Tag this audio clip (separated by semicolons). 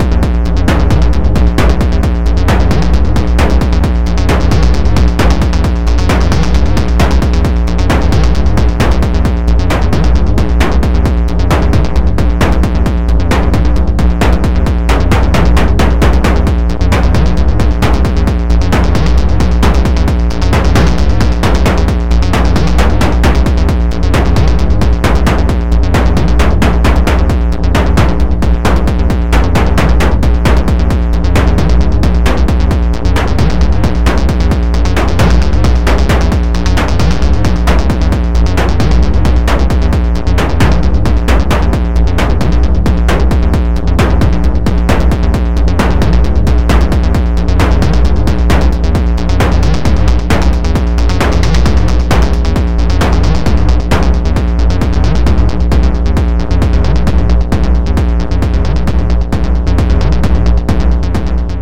303; bass; drum; drumloop; loop; sequence; tekno